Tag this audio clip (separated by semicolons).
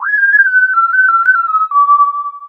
synthesizer; phrase; electronic; riff; keys; flute; synthetic; manual; whistle; analog; synth; ending; keyboard; dry